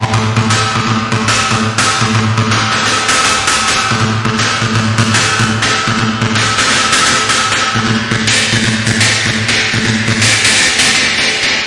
Thank you, enjoy
beats, drums